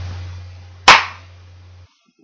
Foley- Gunshot Sound #2
This is a sound for when a gun fires. All i want is credit in the credits, and then the sounds are yours. If you use them I am gald I can help.
Gun, HandGun, Shooting, Shot